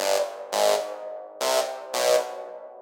Vocoder Saw 2a
Drum and bass loop C minor 170 BPM recorded using Mixcraft DAW, edited with Audacity
synth, stab, drum-and-bass, loop, edm, saw